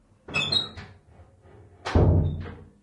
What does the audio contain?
20070529.door.closing
soft door slamming. Senn MKH60+MKH30 into FR2LE